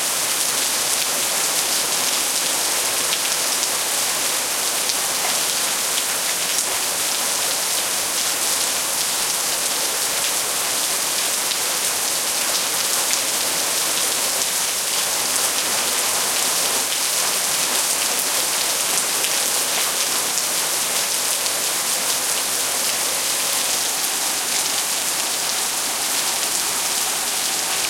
Rain on Sidewalk
Stereo recording of the sound of a heavy rain hitting an urban sidewalk. Exterior.
exterior, field-recording, rain, storm, weather